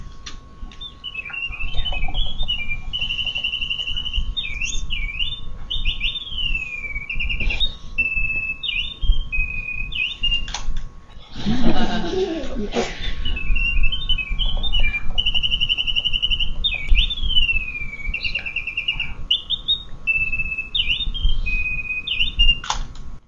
Sound from a little music box with a mechanical bird in a public exhibition.